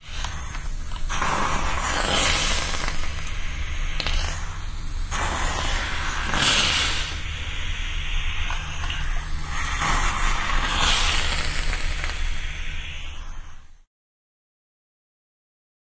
Clothing iron and steam.